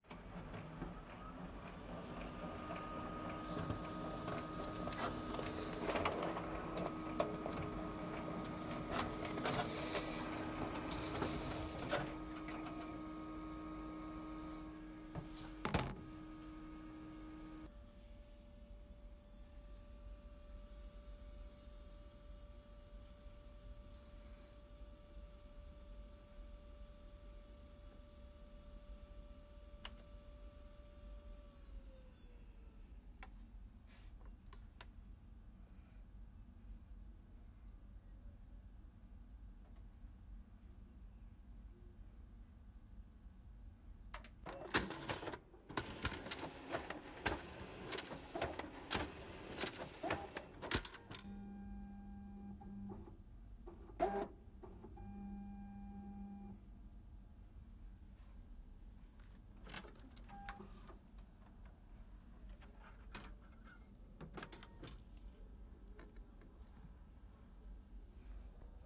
INDUSTRIAL COPY PRINTER
Those big photocopy printers, in action.
field, OWI, recording